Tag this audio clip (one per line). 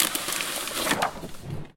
flip
page
paper